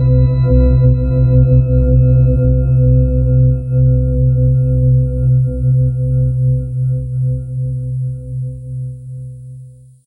A low, rich synthetic Bell Tone.